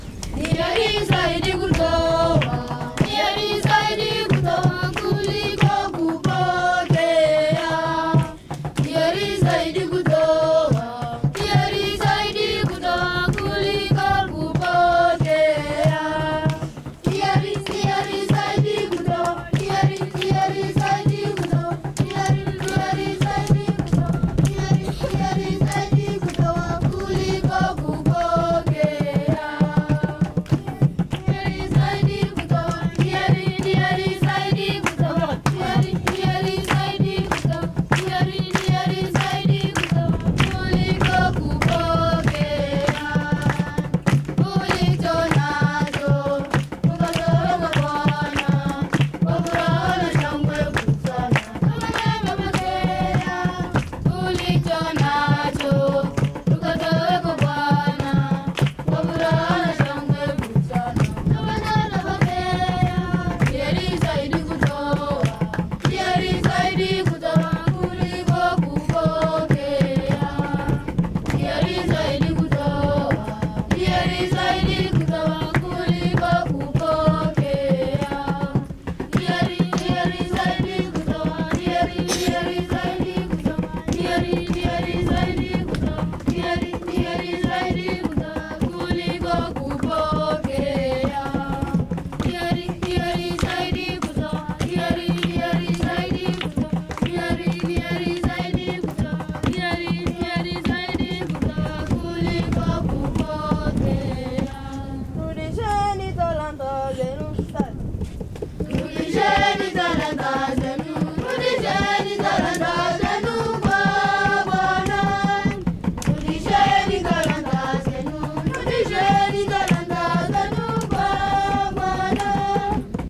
dec2016 mass sing Kenya Turkana Todonyang
mass singing of local Turcana people
under the acacia trees
africa, mass, sing